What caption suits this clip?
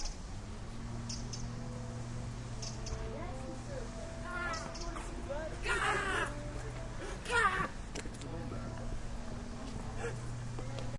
SonicSnaps HD TomJacob Birds&Music
This is a sonic snap of birds and music recorded by Tom and Jacob at Humphry Davy School Penzance